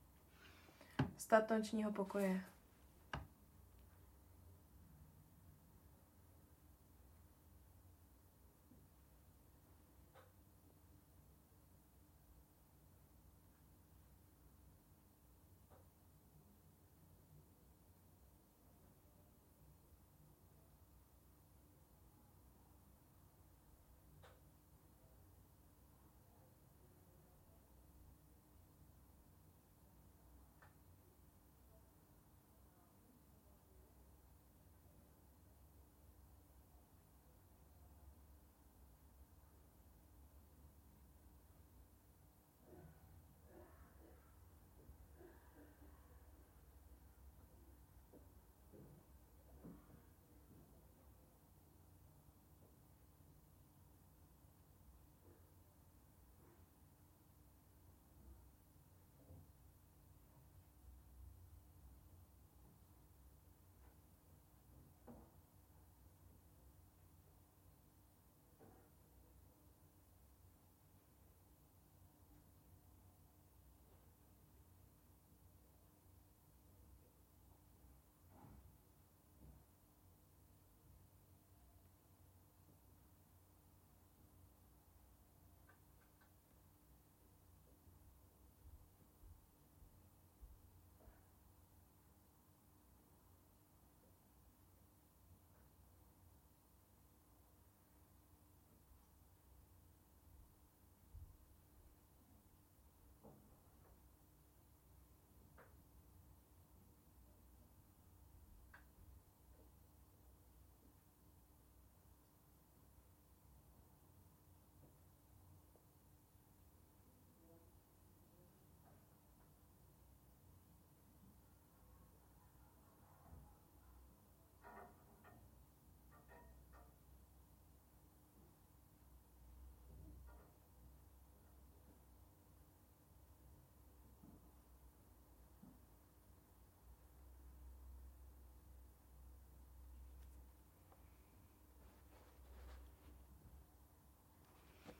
Room night ambience quiet
My room recorded in the night.
Sennheiser MKH 8060, Zoom F4.
Mono
room, ambience